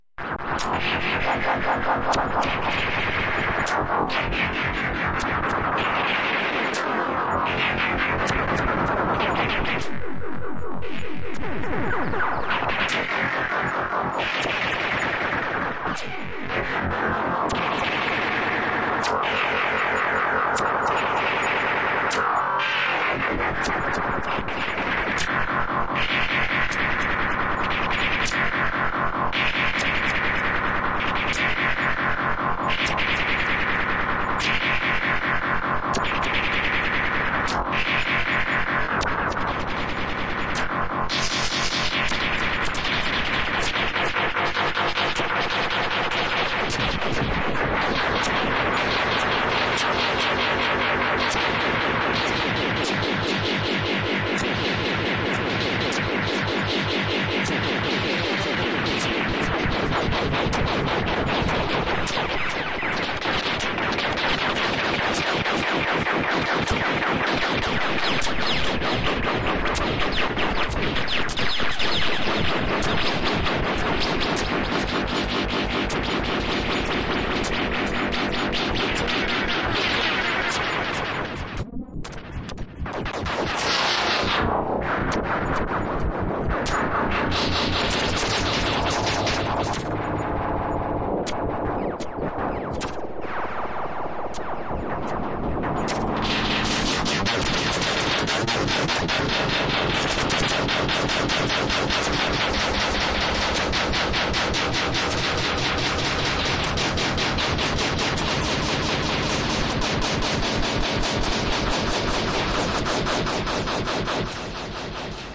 Abstract Glitch Effects 017
Abstract Glitch Effects / Made with Audacity and FL Studio 11